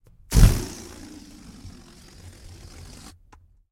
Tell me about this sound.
Balloon - Deflate 06
Fun with balloons :)
Recorded with a Beyerdynamic MC740 and a Zoom H6.
Air, Balloon, Gas, Pressure